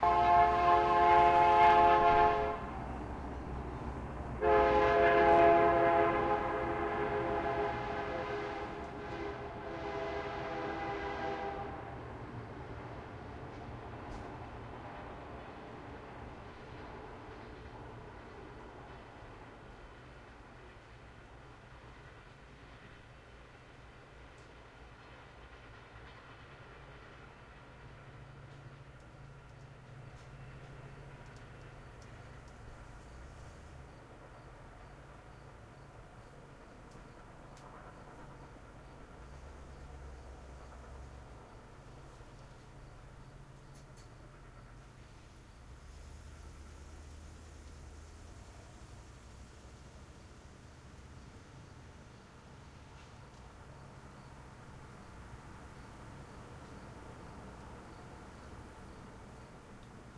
Train passing by, recorded in Saint-Léonard-d'Aston, Québec. Recorded with two SM 57, in a bedroom.
bell, train